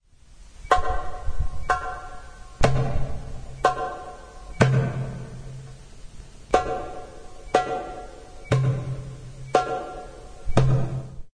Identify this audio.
Bassit Mwessa3 Rhythm
Two cells of Basít muwassa' (slow) rhythm of the moroccan andalusian music
andalusian, arab-andalusian, bassit, compmusic, derbouka, moroccan, muwassa, mwessa3, percussion, solo